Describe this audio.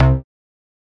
Synth Bass 011
A collection of Samples, sampled from the Nord Lead.
bass, lead, nord, synth